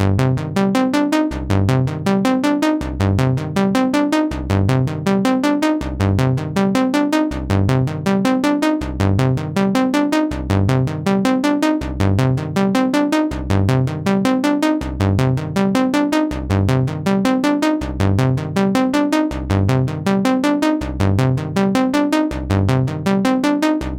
synthloop 80 bpm, strange, uncut and analouge.